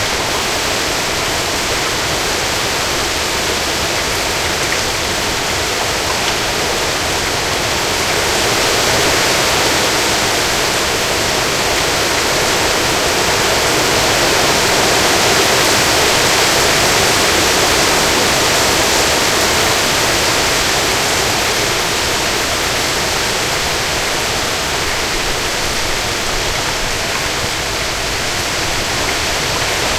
Seamless Rain Loop
Simple rain loop of 30 seconds.
Rainy Weather Courtyard
Recorded with a Zoom H2. Edited with Audacity.
Plaintext:
HTML:
looping, water, raindrops, drops, seamless, weather, rain, wet, dripping, field-recording, raining, drip, loop